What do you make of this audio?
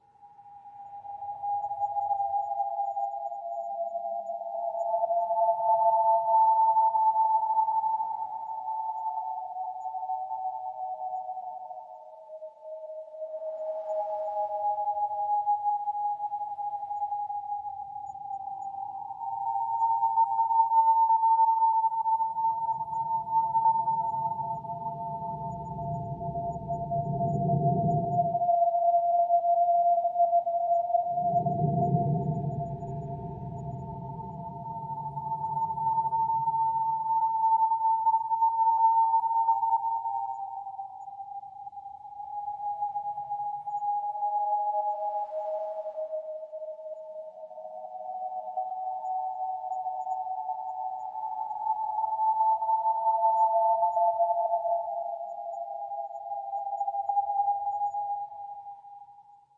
Creepy Whistles
Creepy sounds made from whistling using audacity effects